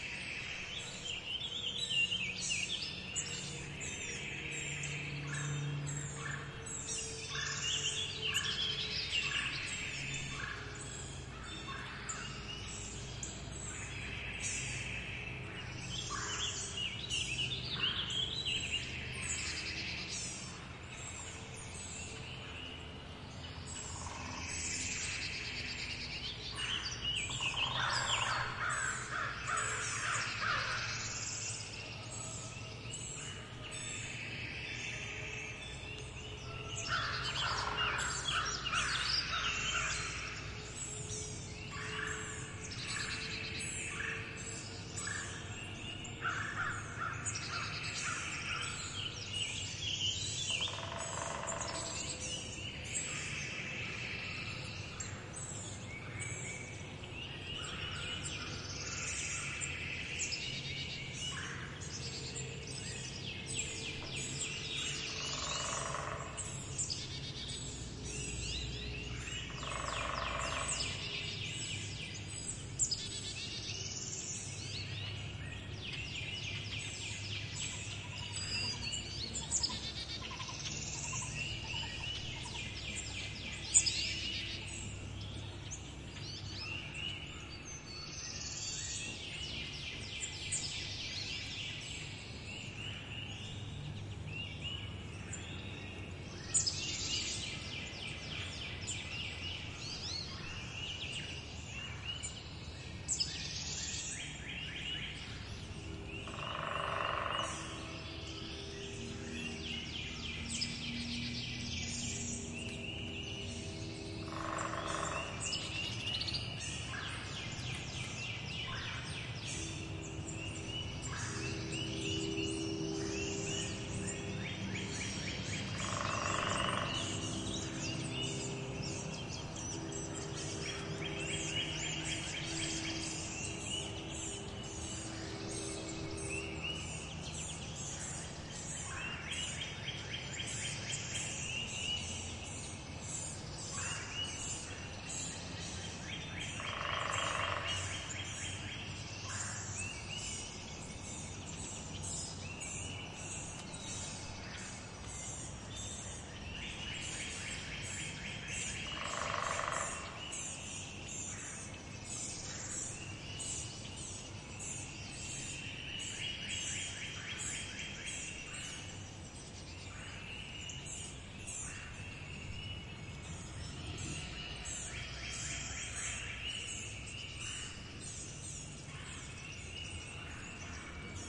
EarlySpringMorningBirdsApril2017DeepFofrest
A busy morning in the deep woods in mid April. Recorded in the Shawnee Forest in Illinois on April 15th, 2017 using the Sound Devices 702 and the stereo microphone, Audio Technica BP4025. A true stereo recording. The migrating birds have arrived from Central and South America. This shows how nature sometimes can be quite loud and busy! Pretty cool to listen to with good headphones on.